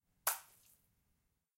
Liquid splatter on floor 1
Liquid splattering on the floor.
blood, floor, liquid, spill, splash, splat, splatter, water, wet